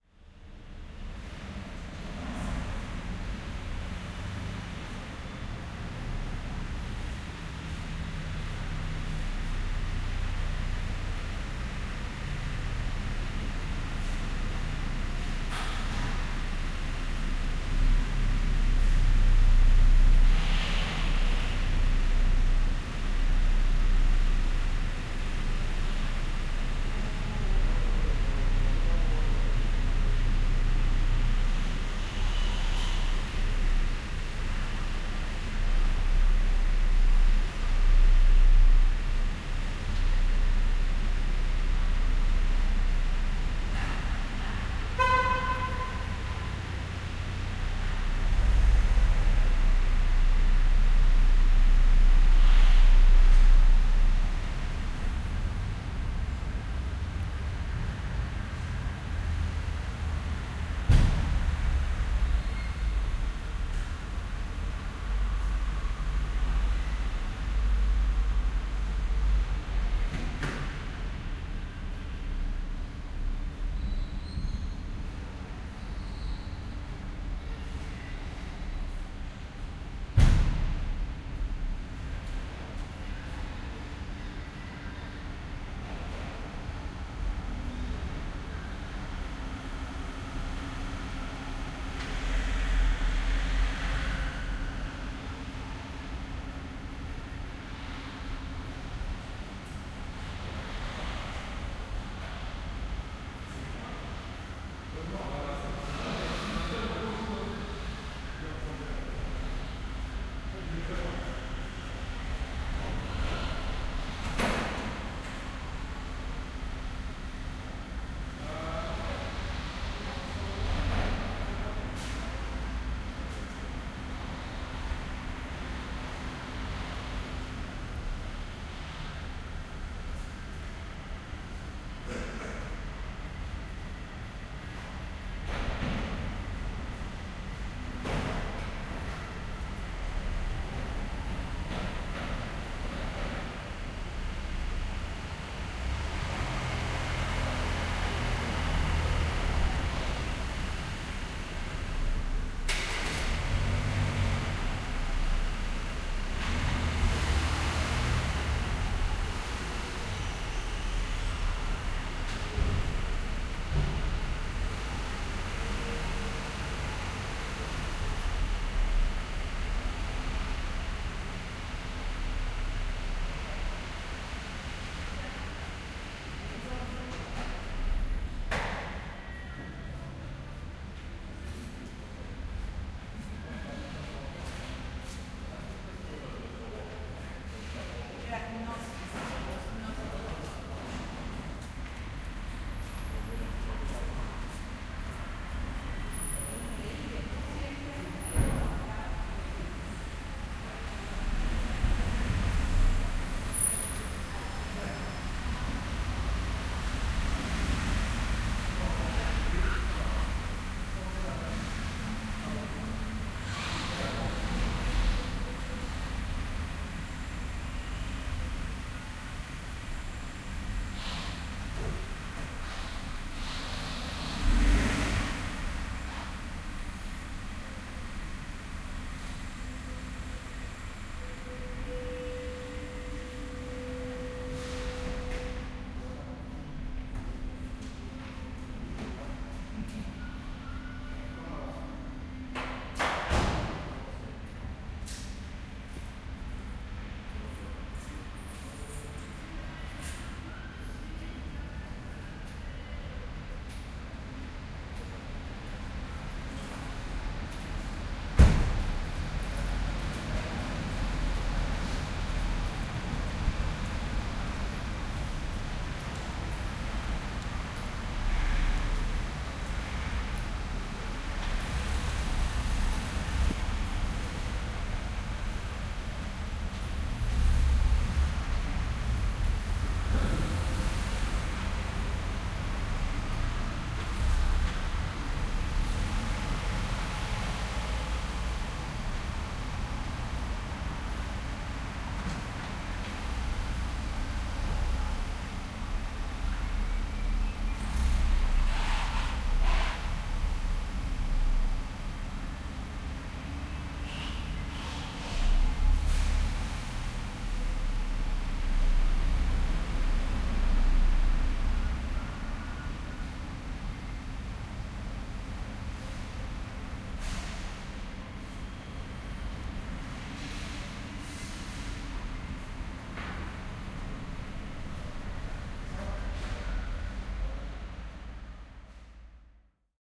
Hardware store car-park. (3rd file).
I made this recording in the underground car-park of a big hardware store.
Here, one can hear some cars parking and passing by slowly, people opening and closing car-doors, and some voices.
Recorded in July 2020 with an Olympus LS-P4 (internal microphones, TRESMIC system on).
Fade in/out and high pass filter at 140Hz -6dB/oct applied in audacity.